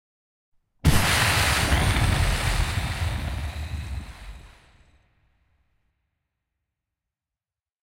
A collection of pitched and stretched vocal takes to replicate the sound of an explosion.